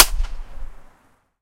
This is a free recording of a concrete/stone corner outside of masmo subway station :)